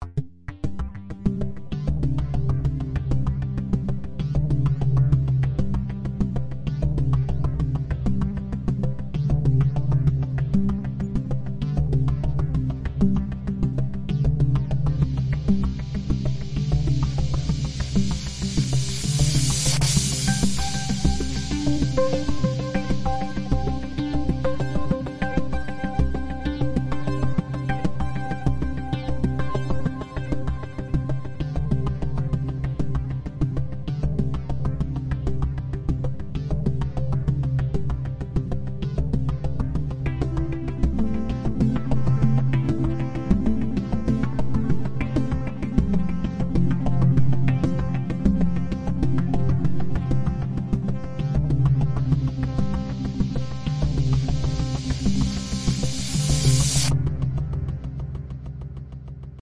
This is a minimalistic unpretentious promo track made with technology and vehicles in mind. NB: This is an alternative version of another track
Thank you for hitting 100 downloads